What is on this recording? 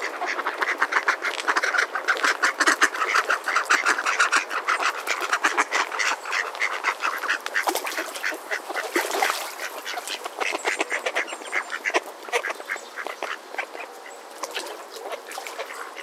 Ducks in Water
About a dozen ducks quacking at the side of a small pond and splashing as several of them jump in the water. Recorded on Zoom H2. Frequencies below 350 Hz are rolled off to help remove ambient city rumble.
splash, quack